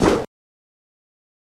Transition Swoosh
swish, swoosh, transition, whoosh, sfx, effect, powerpoint